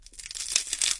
something is broken, crispy. PCM M10 with internal mics
crunch, injury, fracture, broken, break, bone